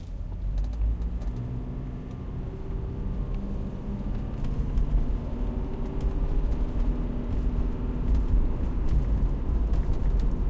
Honda CRV, accelerating from a stopped position. Recorded with a Zoom H2n.